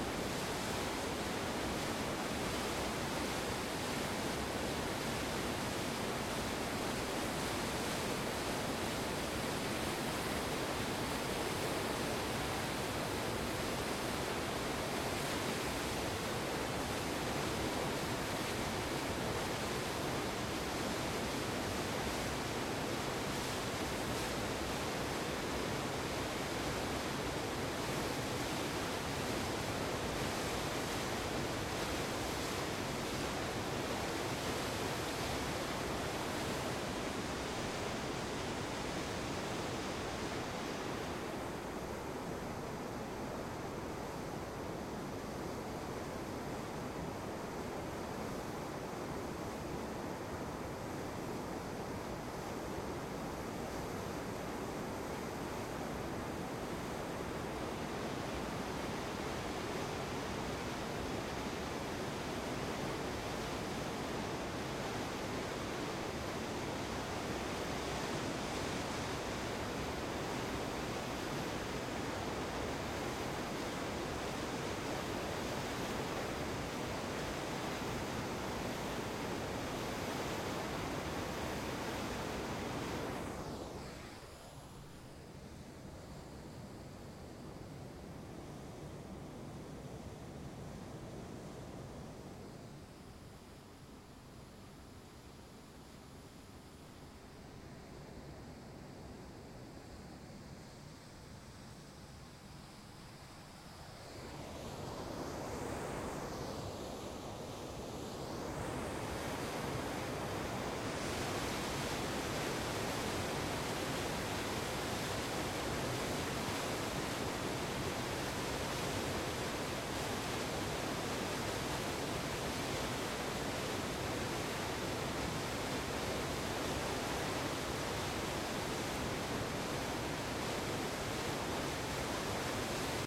amb pulodolobo
2'30'' field recording of river Guadiana (Portugal) when it stretches at Pulo do Lobo
stream, field-recording, river, current, waterfall